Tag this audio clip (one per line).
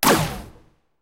Laser
Sci-Fi
Weapon
Space
Gun